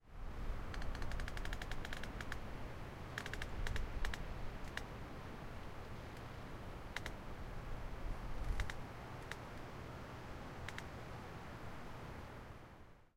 tree creak 05
wind and a more active creaking in higher branches.
wind, tree, nature, wood, creak